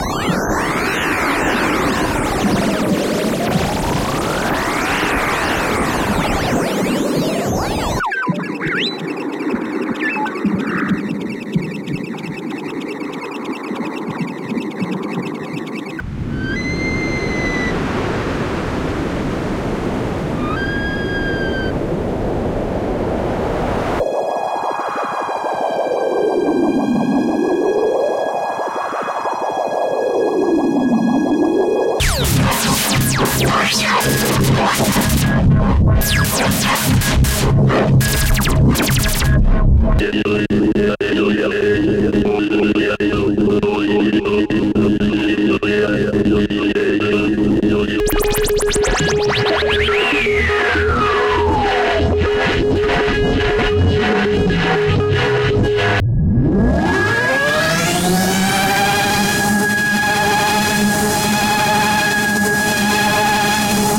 8-LCR-SFX
A Morphagene reel with 8 splices, each with 3 sounds panned left, centre and right